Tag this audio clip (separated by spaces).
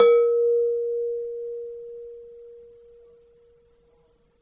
fishbowl ring